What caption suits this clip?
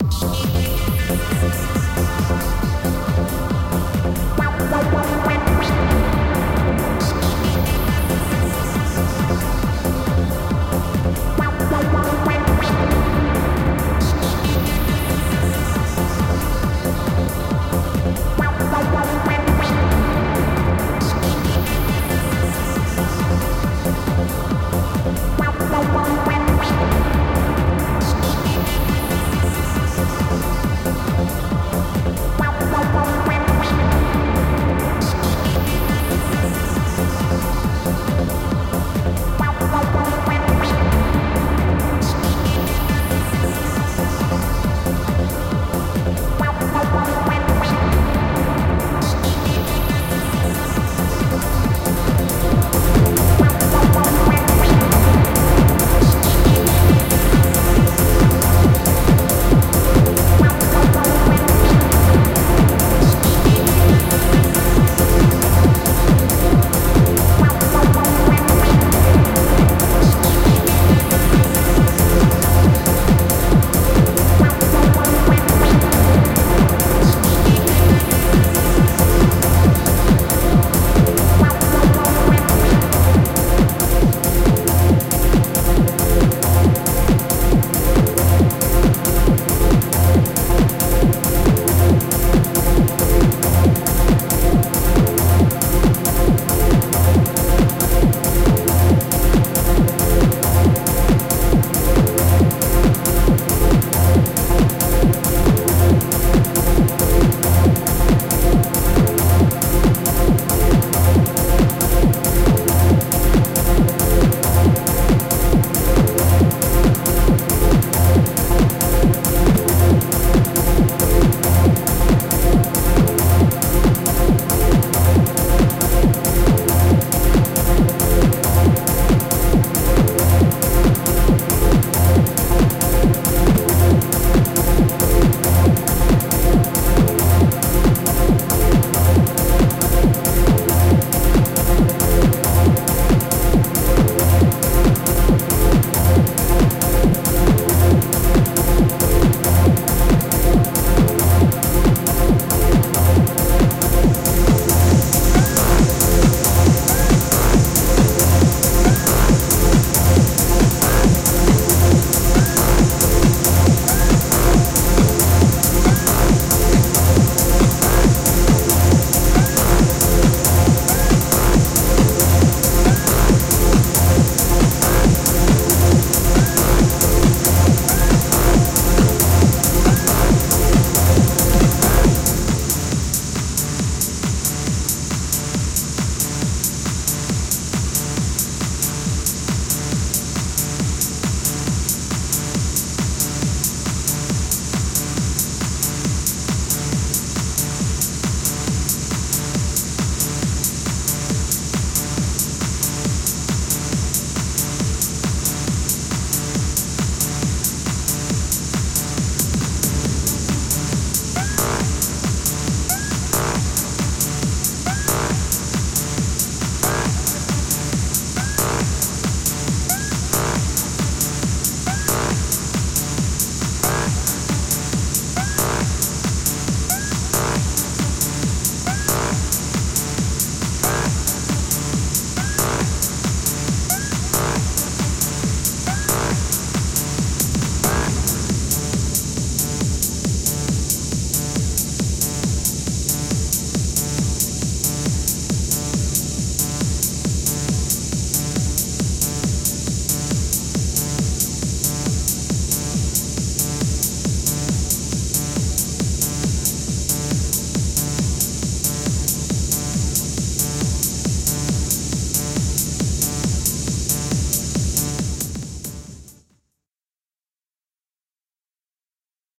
club mix demo like ibiza done on Ableton Live by kris klavenes
hi did this on Ableton Live using crossfade on Ableton hope u like it :)
club, dance, Ibiza, rave, techno, trance, wave